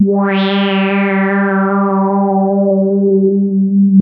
Multisamples created with subsynth. Eerie horror film sound in middle and higher registers.
evil, horror, subtractive, synthesis